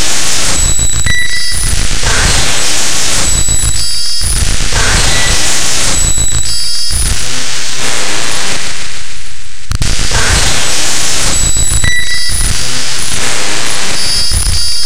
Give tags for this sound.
electronic fubar processed noise

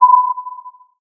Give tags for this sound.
activity detected military navigation presence radar radiolocation scanning sea signal sonar surveillance technology underwater war

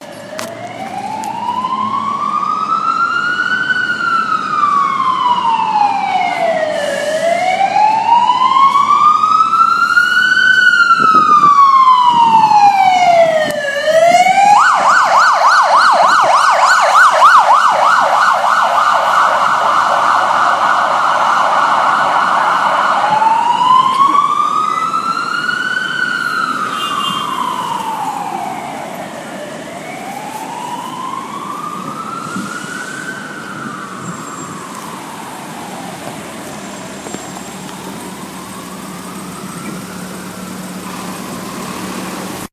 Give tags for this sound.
City Siren Sirens

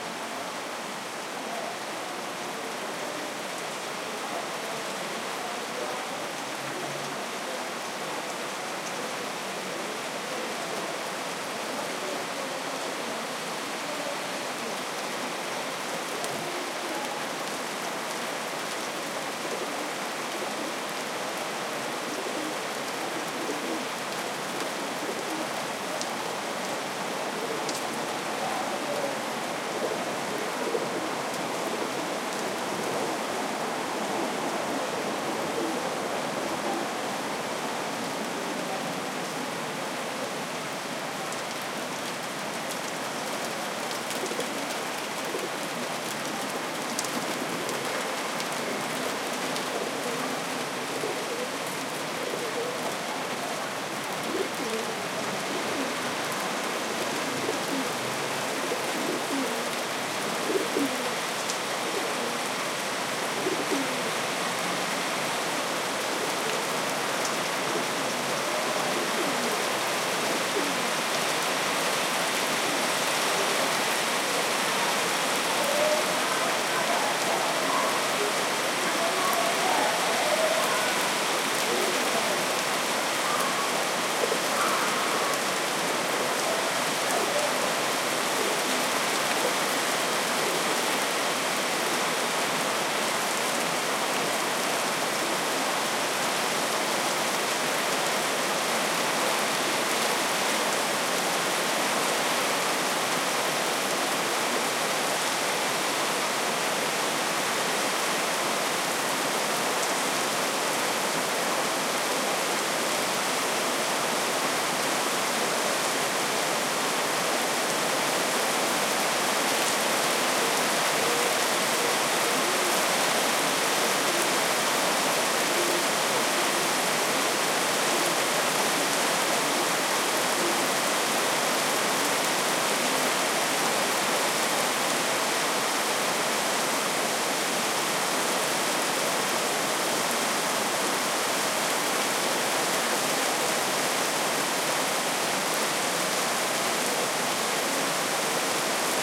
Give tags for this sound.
cars lightning storm nature rain drip field-recording downpour raining pigeon weather dripping heavy water shower street splashing people

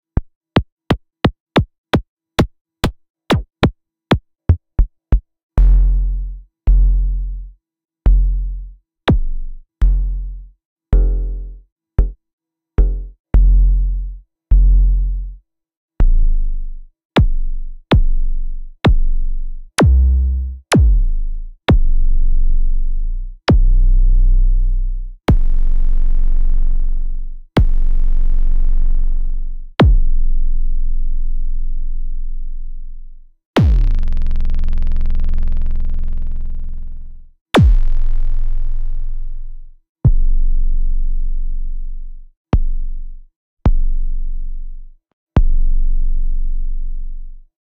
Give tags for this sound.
0-Coast euro-rack kick make-noise modular

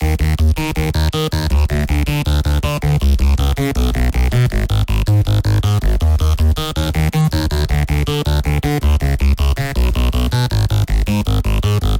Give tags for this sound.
synth melody distorted

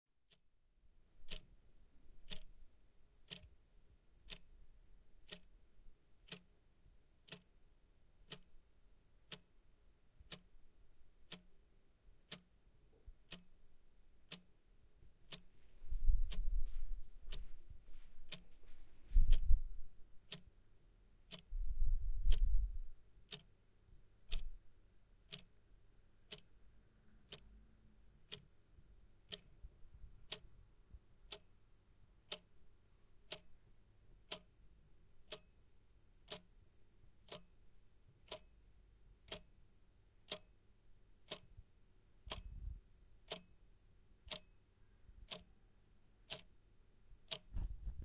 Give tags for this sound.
anxiety clock sound ticking tick-tack time

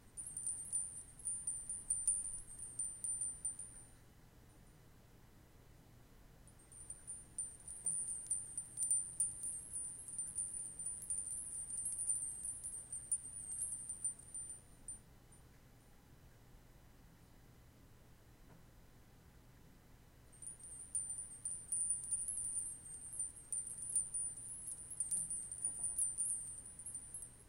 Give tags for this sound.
bells delicate ethereal fairy Indian jingle soft

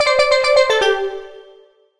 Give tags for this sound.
Asset Percussive Tropical Virtual-instrument

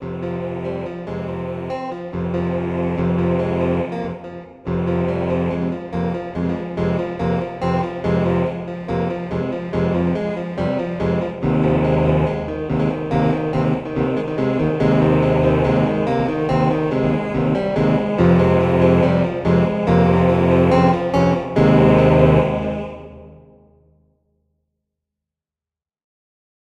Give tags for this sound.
Suspense doom court trial battle impending awaiting verdict

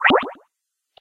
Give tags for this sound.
bleep bloop effects beep short sounddesign gamesound sound-design beeps plop buttonsound gamesounds menusound sfx button

